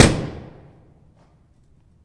One of a pack of sounds, recorded in an abandoned industrial complex.
Recorded with a Zoom H2.
city, clean, field-recording, high-quality, industrial, metal, metallic, percussion, percussive, urban